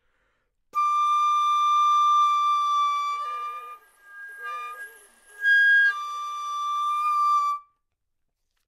Flute - D5 - bad-timbre
Part of the Good-sounds dataset of monophonic instrumental sounds.
instrument::flute
note::D
octave::5
midi note::62
good-sounds-id::3226
Intentionally played as an example of bad-timbre
multisample, single-note, neumann-U87, flute, good-sounds, D5